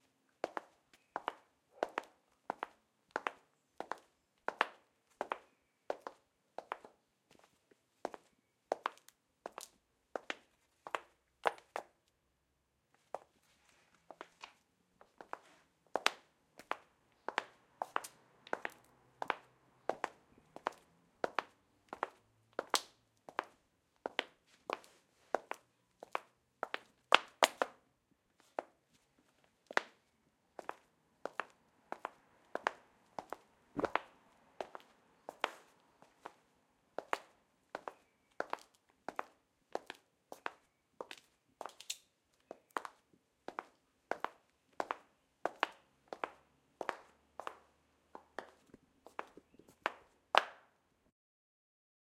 High Heels walking OWI
simply shoes walking